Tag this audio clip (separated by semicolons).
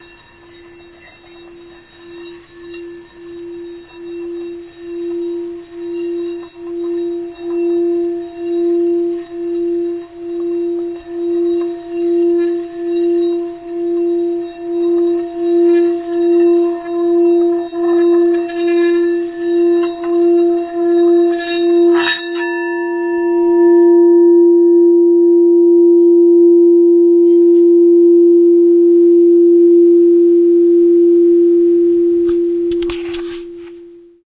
domestic-sounds; field-recording; instrument; tibetan-bowl; vibration